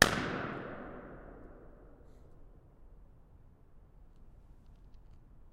Balloon burst under brick arch
A balloon burst under a brick arch in Castlefield, Manchester. Balloon 2
arch, balloon, brick, field-recording, railway, reverberance